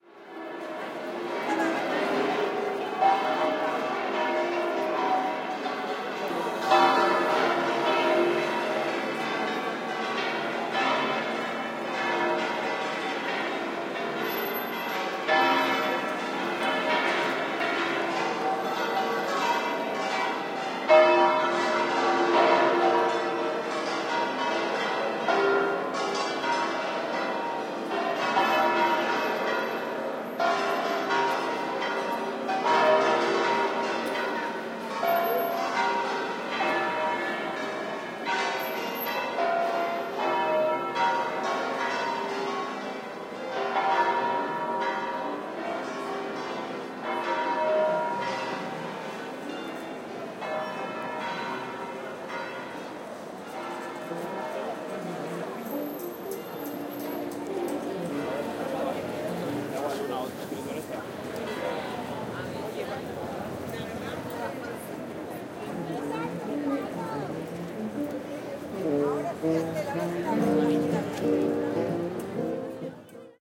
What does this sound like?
20151207 pealing.bells
Pealing bells of Seville's Cathedral, Spanish talk and pedestrian noise in background. Soundman OKM mics into Sony PCM M10
voice, field-recording, city, street, talk, Spain, bells, Spanish, ambiance, church, binaural